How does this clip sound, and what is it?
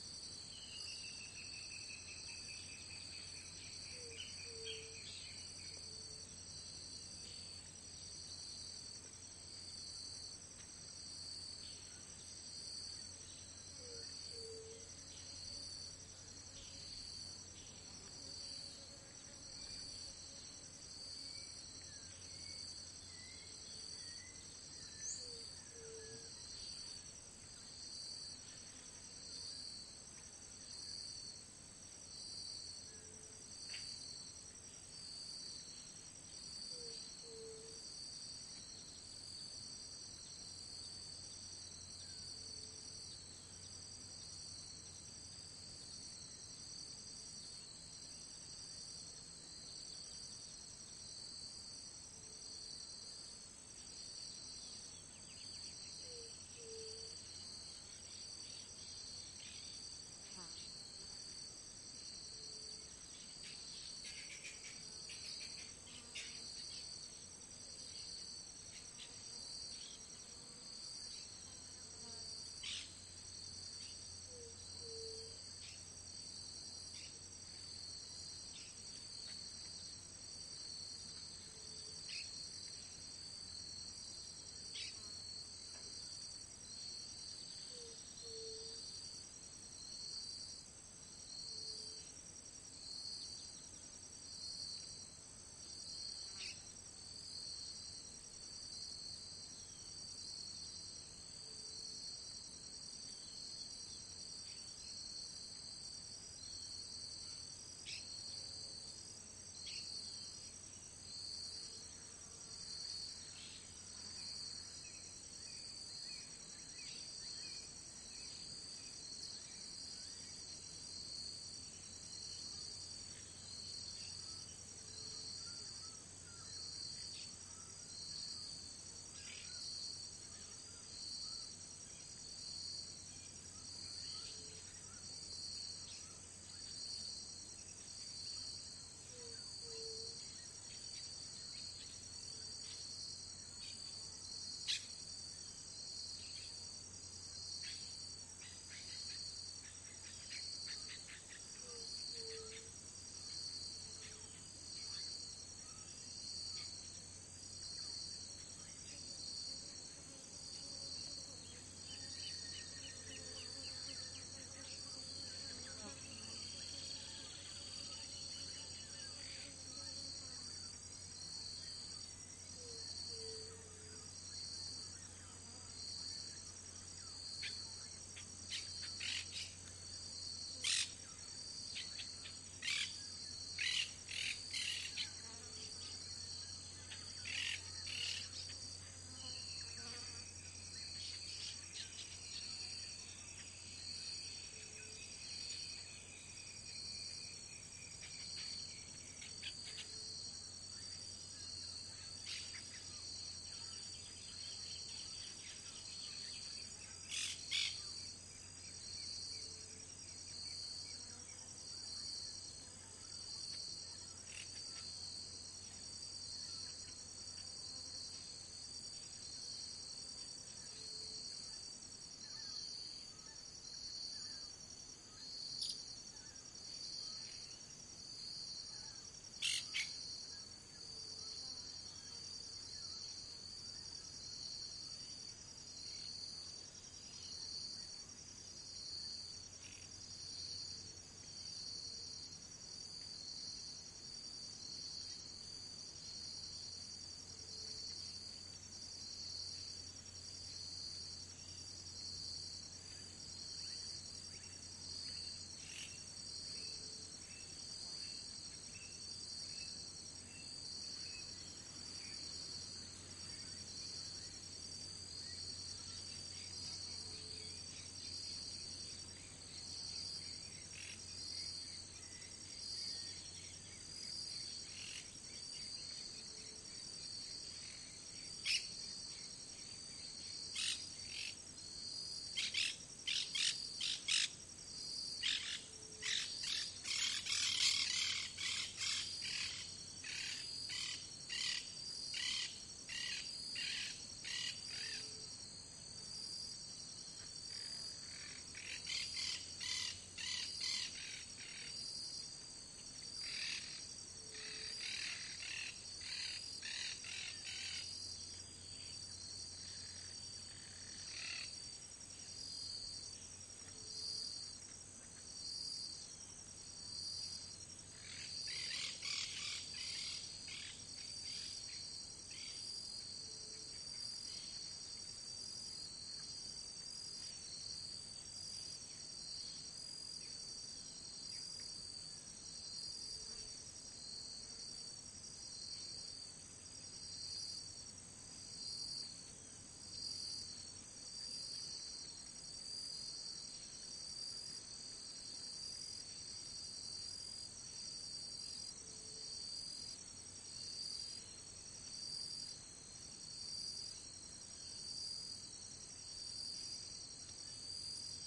Brasil Pentanal insects birds 04
Brazil, Pentanal, ambiance, ambience, ambient, birds, birdsong, day, field-recording, insects, nature, wetland